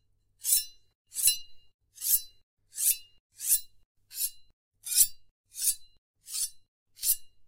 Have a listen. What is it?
This sound is to create the impression a sword is being taken from its sheath but by all means use it how ever you please.
I created this sound by scraping two big knifes together.